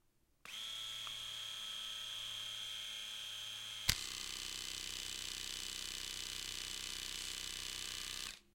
electric shaver plus trimmer
Turning on an electric razor then opening the trimmer part. Recorded with AT4021s into a Modified Marantz PMD661.
motor, razor, shaver, whir, foley, electric